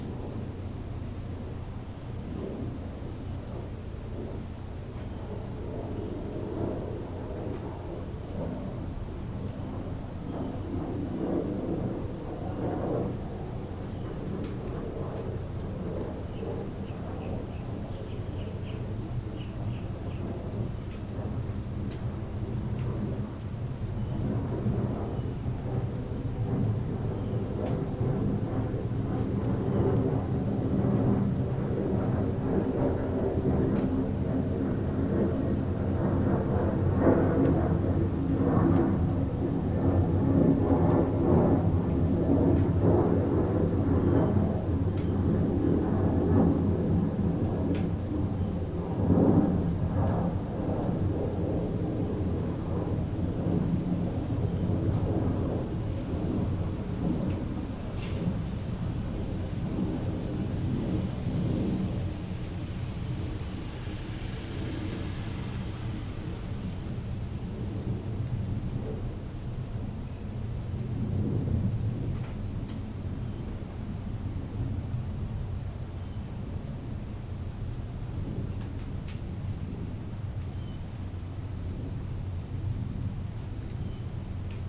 Jets fly overhead outside on the patio with the laptop and USB microphone.
ambience, jet, patio, engine